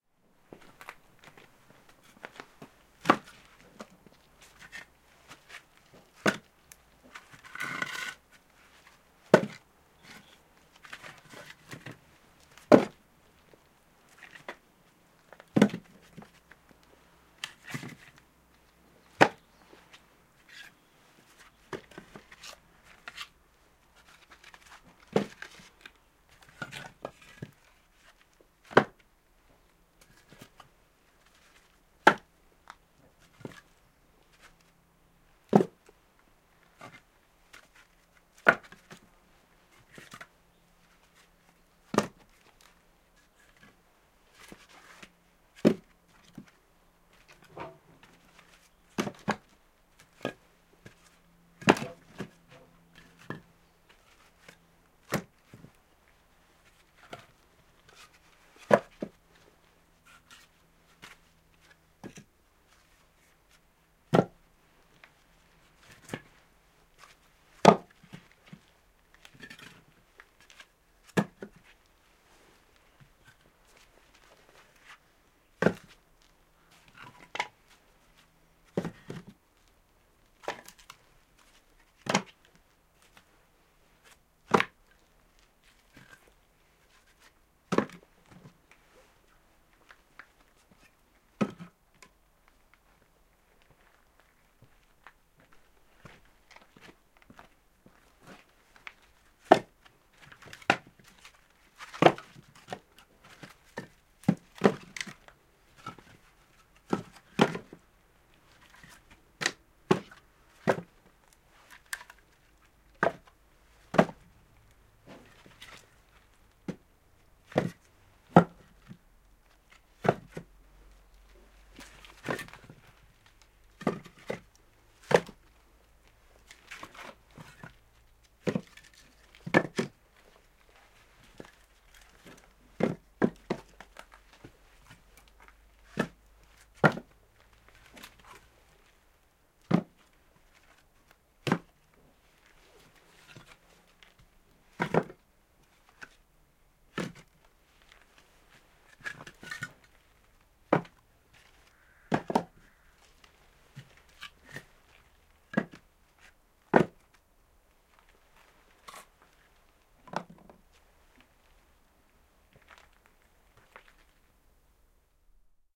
A stereo field-recording of dry Spruce logs being stacked. Rode NT4 > FEL battery pre-amp > Zoom H2 line in.